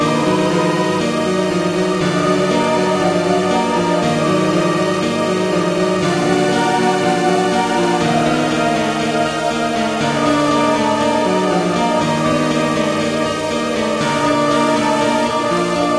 short loops 09 03 2015 1
made in ableton live 9 lite
- vst plugins : Wombat Solina violin, B8Organ, Sonatina Choir1&2/flute, Orion1, Balthor - All free VST Instruments from vstplanet !
- midi instrument ; novation launchkey 49 midi keyboard
you may also alter/reverse/adjust whatever in any editor
gameloop game music loop games organ sound melody tune synth piano